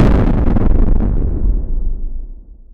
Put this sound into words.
Explosions created using Adobe Audition
big, explosion, noise